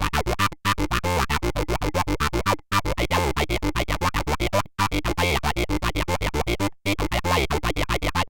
FunkySynth Retweek Talkboxer-wha 116bpm
Another talkbox effect this time applied to a sequenced gated synth.
4 bar, 116 bpm
The sound is part of pack containing the most funky patches stored during a sessions with the new virtual synthesizer FM8 from Native Instruments.
abstract, electro, electronic, funk, funky, gate, lead, live, loop, modulation-wheel, rhythym, riff, sequence, soundesign, synth, synthesizer, talkbox, wha-wha